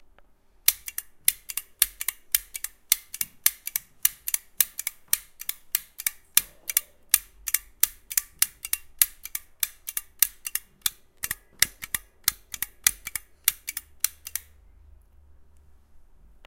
mySound Sint-Laurens Belgium Nietmachine
Sounds from objects that are beloved to the participant pupils at the Sint-Laurens school, Sint-Kruis-Winkel, Belgium. The source of the sounds has to be guessed.
Belgium, mySound, Nietmachine, Sint-Kruis-Winkel